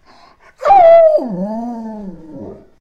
A recording of my Alaskan Malamute, Igor, while he is waiting for his dinner. Specifically, an anticipatory squeal of excitement. Malamutes are known for their evocative vocal ability. Recorded with a Zoom H2 in my kitchen.
bark, moan, wolf, growl, husky, dog, malamute, sled-dog, howl